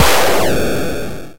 Quick Downward Noise2fad
downward noise fading